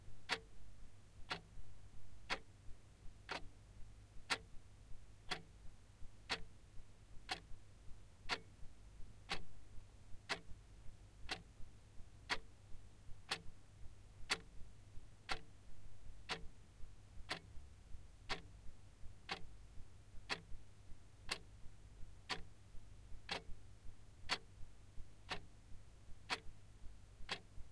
A wall clock, recorded with a Zoom H1.